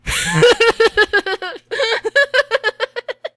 great giggle laugh!
this laugh turned into a giggle somehow
female, giggle, girl, laugh